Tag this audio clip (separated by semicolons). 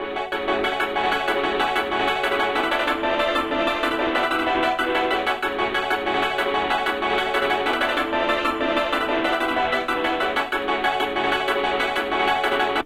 synth; minor-key; stabs; loop; staccato